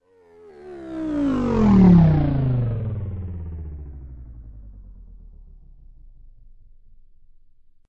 space race car pit stop
Aliens version of a NASCAR race car making a pit stop.